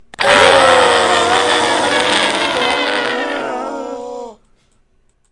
A gibbering mouther shrieking after being frightened or damaged. This was done by Foley work I was doing for Ballad of the Seven Dice. I modified my voice with numerous effects in Audacity to change the pitches as well as layer a number of them together.